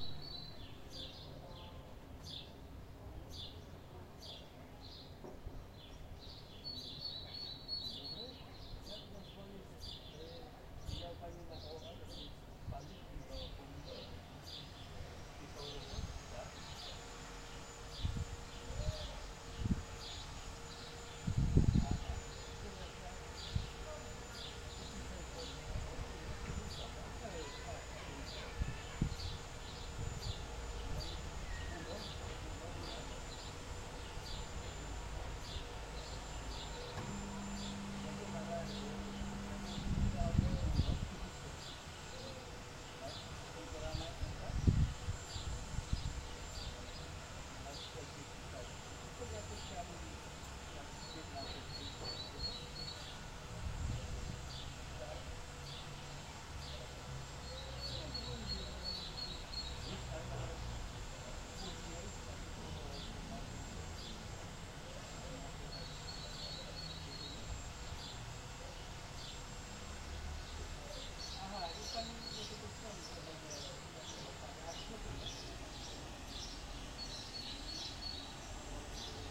sounds right in the center of a small town. There is a restaurant in the background, someone is renovating next door, birds are singing, and somewhere a child is crying.
record by my phone poco f2